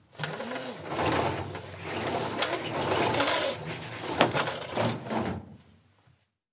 Spanishblind closing
blind, close, closing, persiana